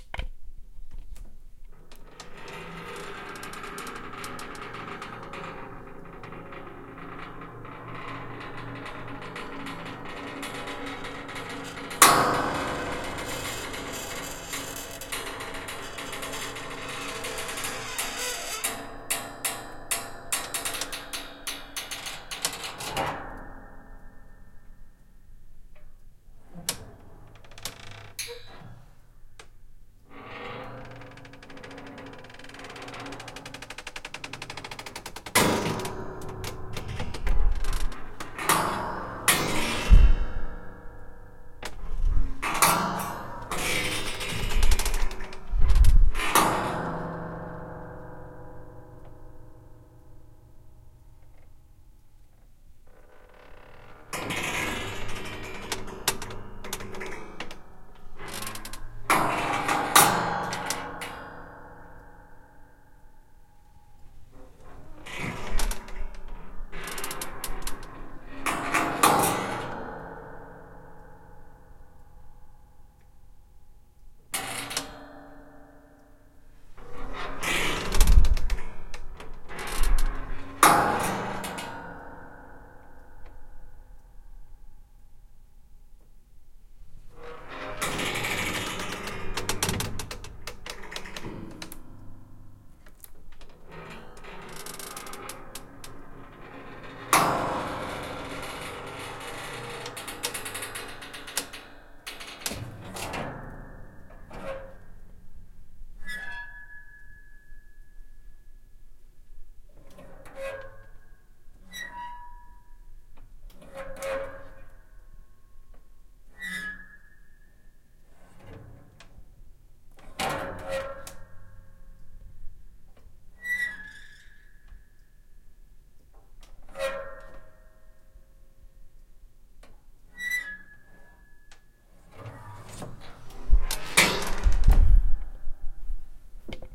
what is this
Attic door creaking 2
Recorded pull down attic door in my house with a Zoom h4n. Clean recording. Could be used as a sound effect or altered to fit an abstract soundscape. This is the longer recording of the two but probably the better.